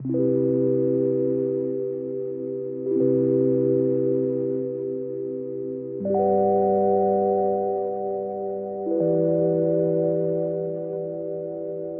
Summer Love Tyler "Flower" Synth Keys - 80bpm - C
synth, chill, summer, ambient, progression, loop, hip-hop, keys, tyler-the-creator, tyler, trap, fun